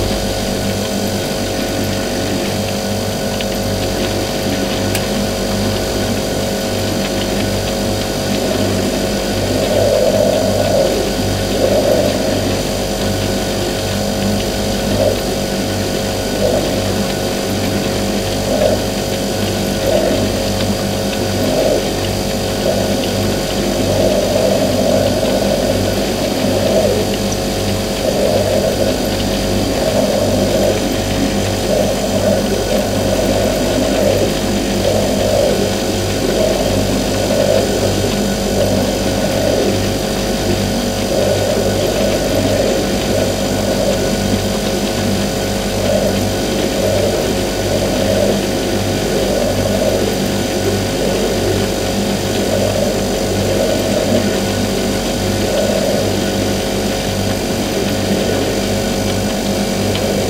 Recorded from the immediate vicinity of the fridge's backside. Noises and sighs mix with a purring compressor.